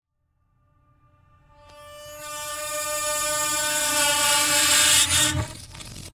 ice door reversed
recordings of a grand piano, undergoing abuse with dry ice on the strings
abuse, dry, ice, piano, scratch, screech, torture